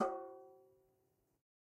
Metal Timbale left open 016
garage, conga, drum, trash, timbale, real, record, kit, god, home